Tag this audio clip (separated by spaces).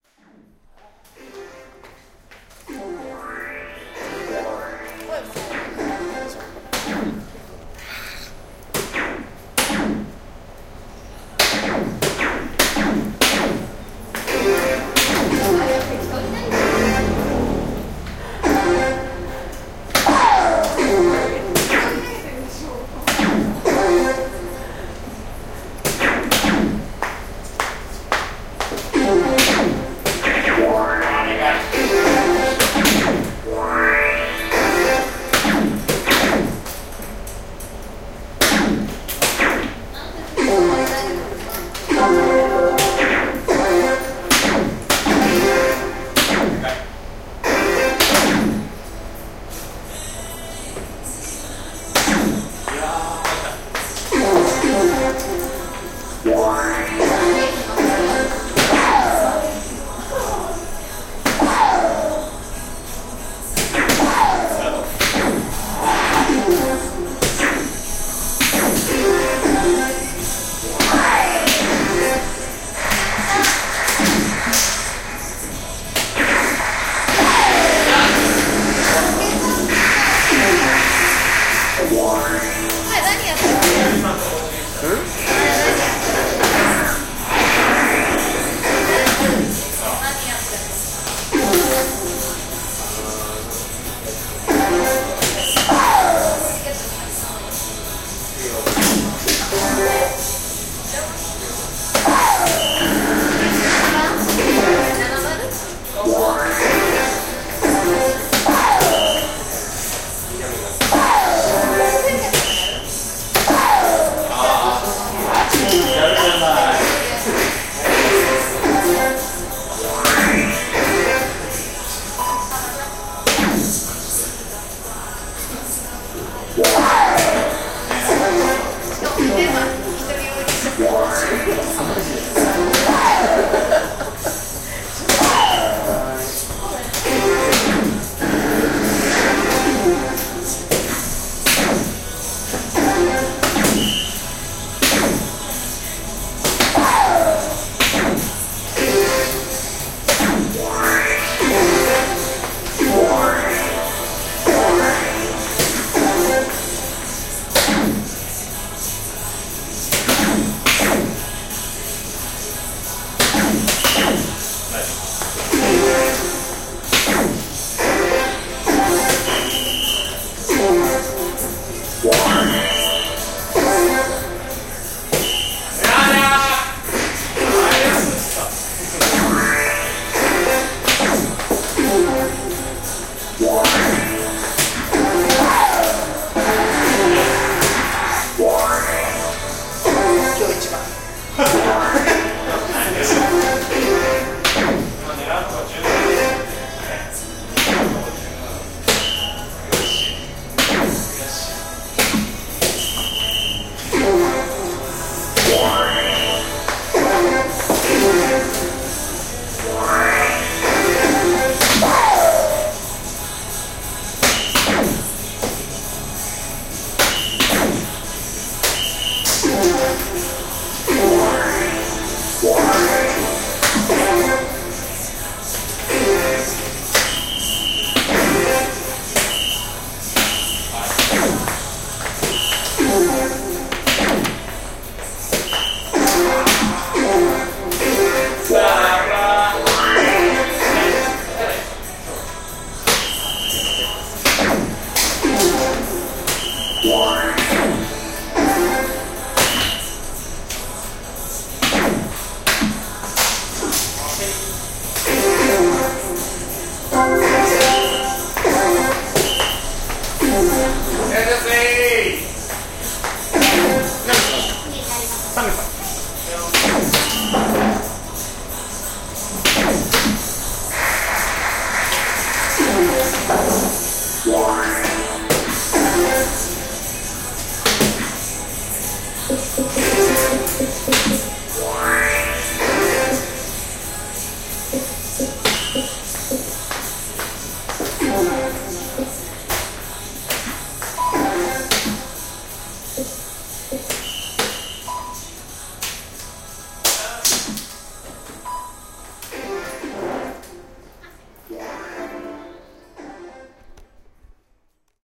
ambience background darts darts-bar electronic-darts field-recording japan japanese-language night tokyo